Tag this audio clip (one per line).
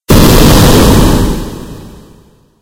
bomb
boom
game
military
army
explosive
destruction
games
war
explosion
video
artillery